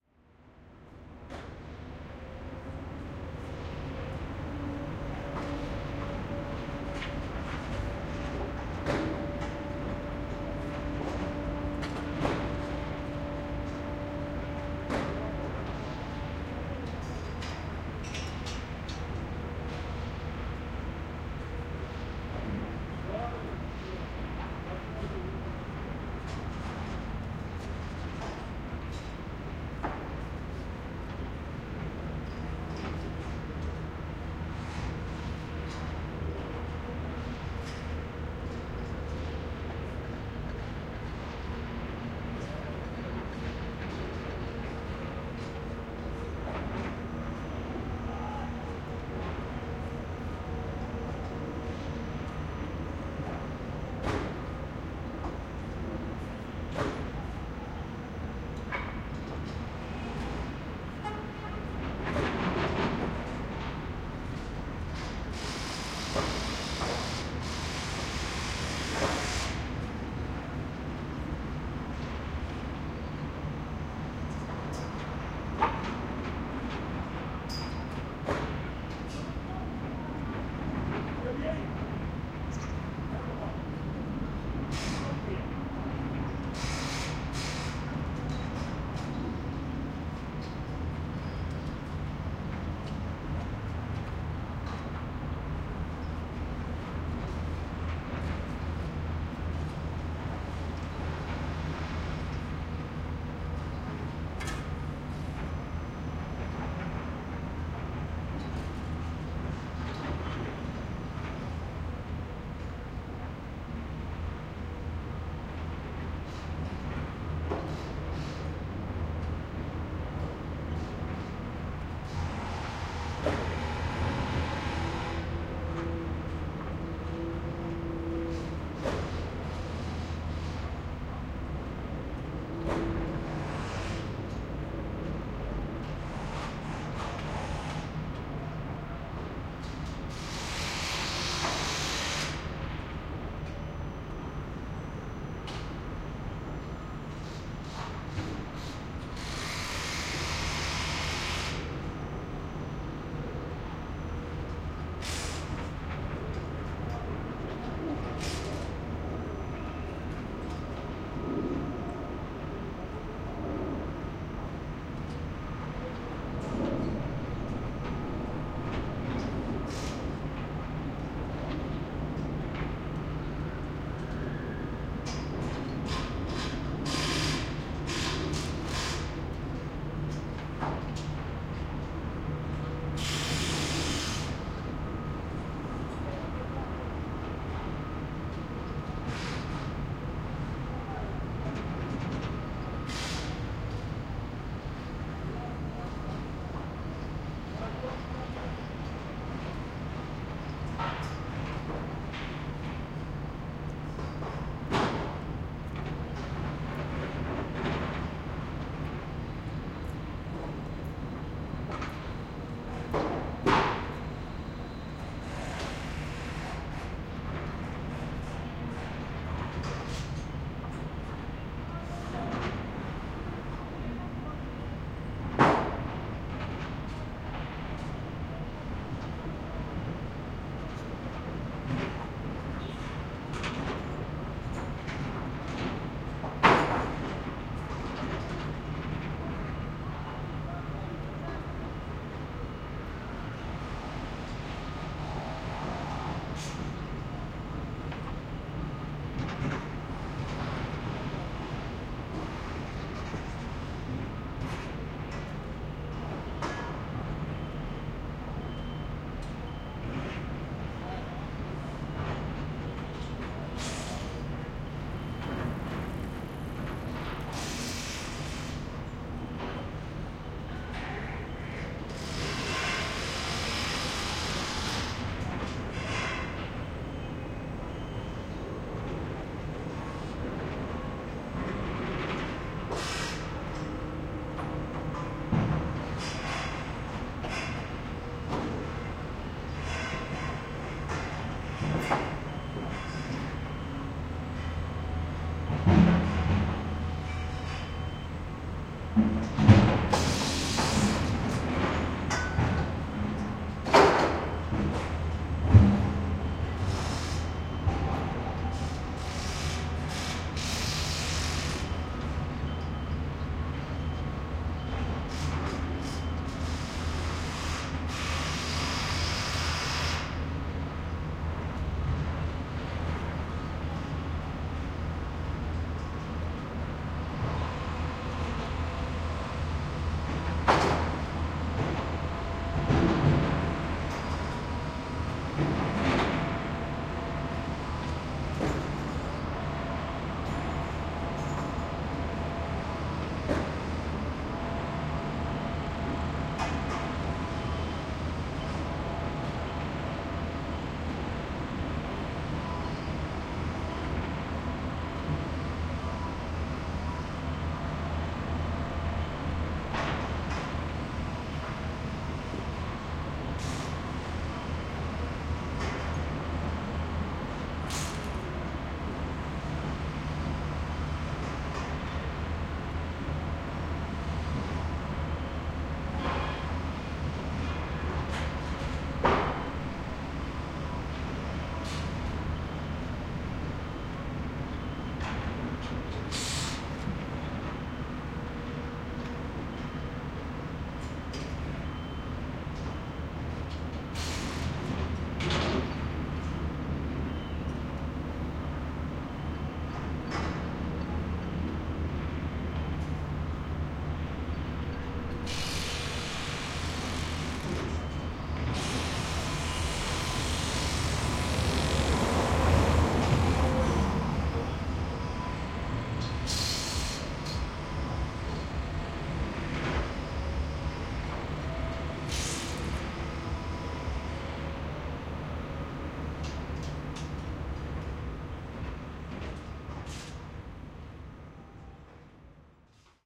Construction Site Sound - Take 1
atmo, construction, ambiance, soundscape, loud, ambient, rumble, background, site, background-sound, atmos